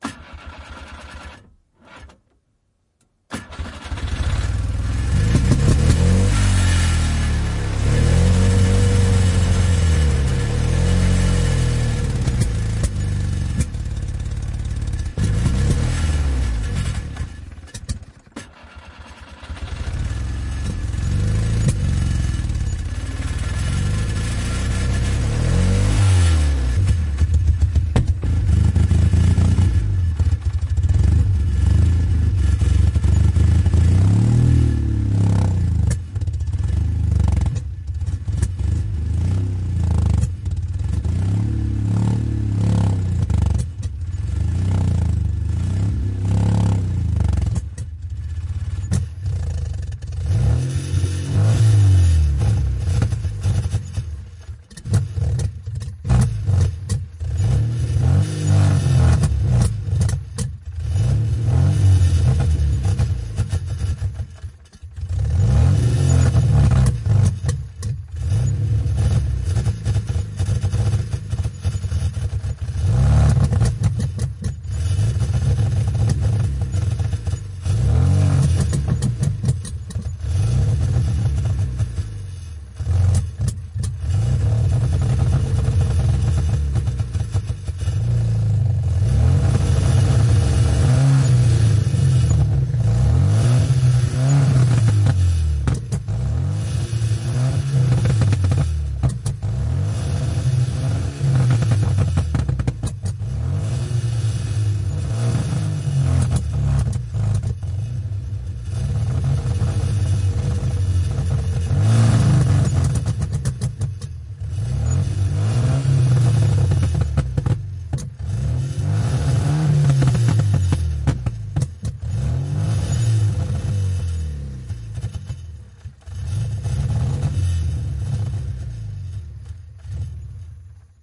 Henkilöauto, huonokuntoinen, tyhjäkäynti / A worn out car, idling, exhaust, Skoda 120L, a 1985 model
Skoda 120L, vm 1985. Köhisevä, paukkuva ja helisevä. Käynnistyksiä ja ontuvaa tyhjäkäyntiä, välillä epäonnistuneita käynnistysyrityksiä.
Paikka/Place: Suomi / Finland / Vihti, Nummela
Aika/Date: 17.03.1988
Auto, Motoring, Tehosteet, Suomi, Field-Recording, Autoilu, Yle, Autot, Finland, Finnish-Broadcasting-Company, Yleisradio, Cars, Soundfx